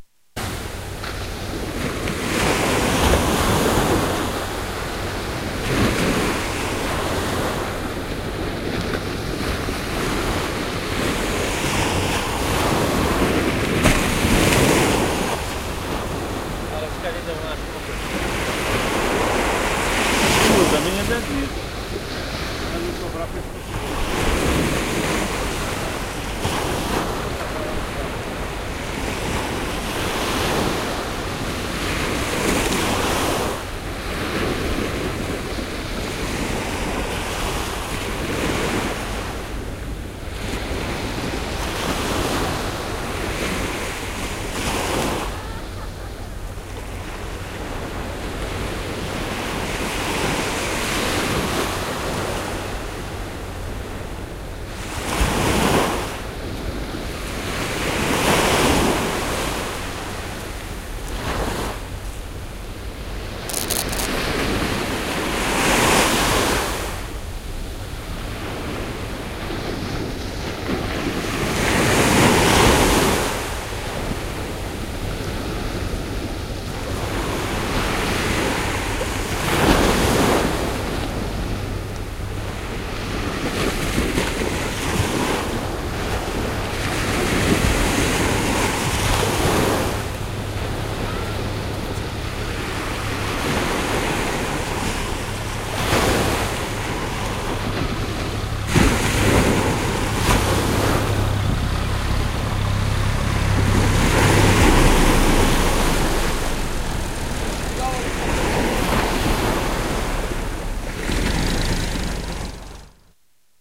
Waves breaking on a beach in Armacao de Pera, near the chapel Nossa Senhora da Rocha, Algarve, Portugal, summer 1997, talking people passing by, recorded from the upper part of the cliffs. Sony Dat-recorder